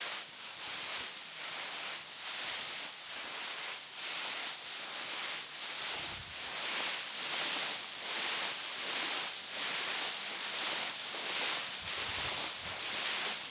Pushing Leaves
This is the sound of leaves being pushed by feet.
Recorded on a Tascam DR-40
Autumn, DR, Foot, Leaf, Leaves, Push